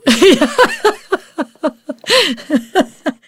CLOSE FEMALE LAUGH 039
A well-known author visited the studio to record the 'audio book' version of her novel for her publisher. During the 16 hours (!) it took to record the 90,000 word story we got on really well and our jolly banter made it onto the unedited tracks. The author has given me permission to keep and share her laughter as long as I don't release her identity. Recorded with the incredible Josephson C720 microphone through NPNG preamp and Empirical Labs compression. Tracked to Pro Tools with final edits performed in Cool Edit Pro. At some points my voice may be heard through the talkback and there are some movement noises and paper shuffling etc. There is also the occasional spoken word. I'm not sure why some of these samples are clipped to snot; probably a Pro Tools gremlin. Still, it doesn't sound too bad.
book, laughter, npng, giggle, female, voiceover, author, humor, over, joy, laugh, voice, mirth, josephson, happiness, novel, c720, funny, labs, girl, woman, story, close, microphone, mic, jolly, guffaw, humour, empirical